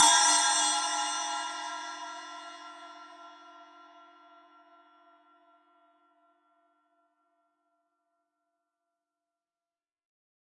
SC08inZilEFX1-Ed-v07
A 1-shot sample taken of an 8-inch diameter Zildjian EFX#1 Bell/Splash cymbal, recorded with an MXL 603 close-mic
and two Peavey electret condenser microphones in an XY pair.
Notes for samples in this pack:
Playing style:
Bl = Bell Strike
Bw = Bow Strike
Ed = Edge Strike
1-shot, cymbal, velocity